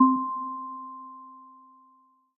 Sine Beep Sytlized App UI
achievement, application, beep, bleep, blip, bloop, button, buttons, click, clicks, correct, game, game-menu, gui, mute, puzzle, sfx, startup, synth, timer, ui, uix